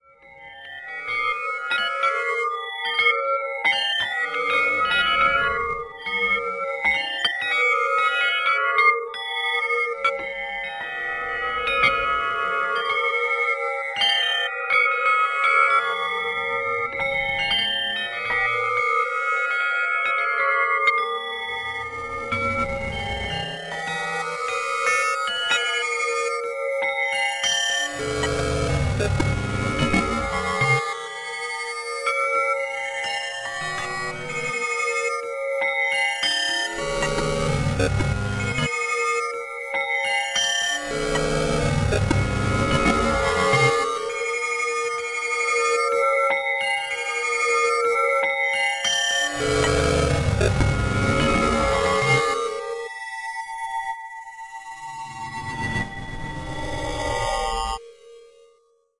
Hells Bells - 01
Bells echoing in the dark recesses of a sticky ear cavern.
ambient, bells, chimes, dark, discordant, dissonant, distorted, grain, granular, hells